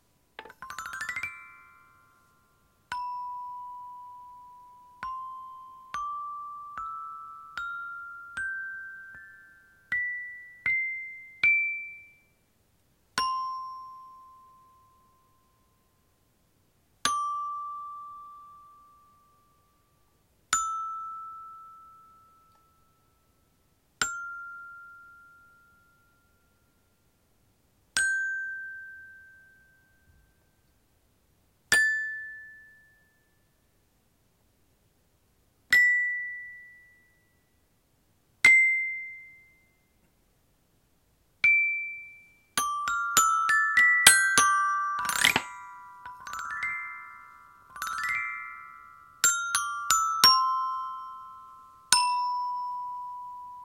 A children's glockenspiel